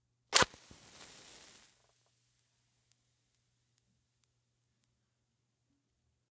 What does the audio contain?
match strike 03
Striking or lighting a match!
Lighting a match very close to a microphone in a quiet place for good sound isolation and detail. One in a series, each match sounds a bit different and each is held to the mic until they burn out.
Recorded with a Sennheiser MKH8060 mic into a modified Marantz PMD661.